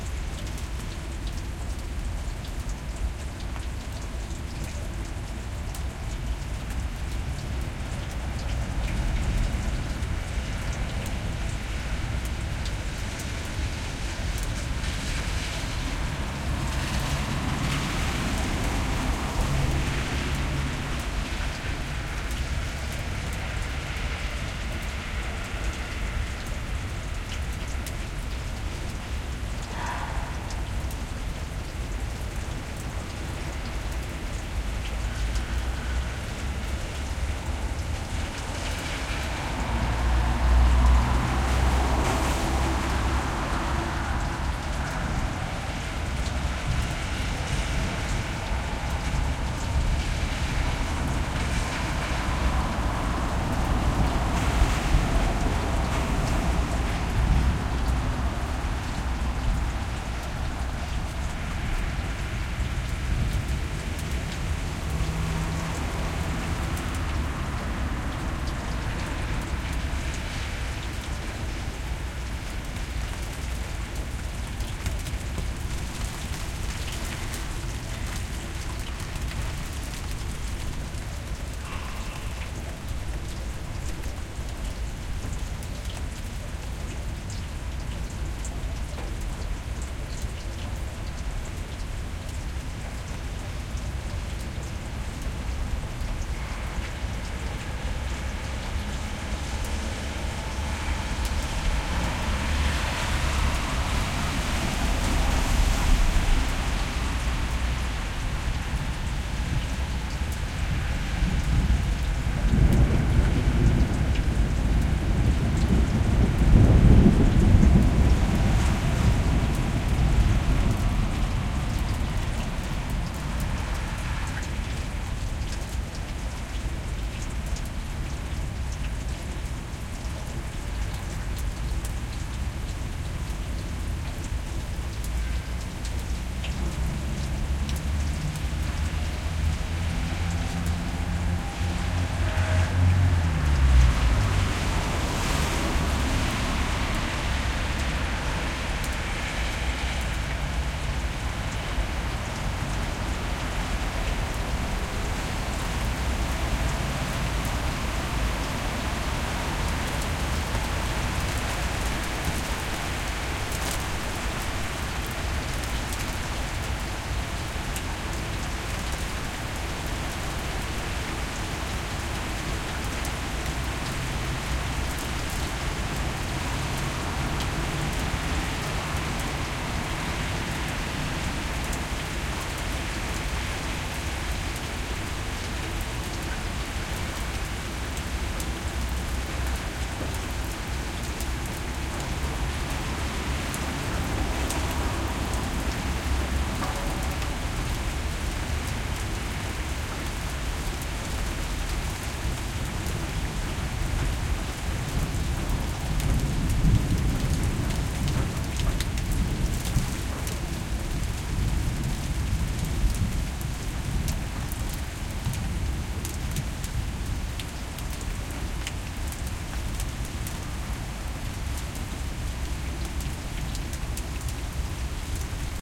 rain - medium rain from 5th floor balcony, drops from tin roof, narrow street below, cars passing
field, Moscow, rain, recording